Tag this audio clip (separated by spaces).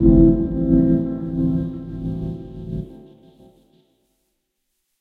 audio Dub electronic electronica live music one production sample samples shot stab stabs synth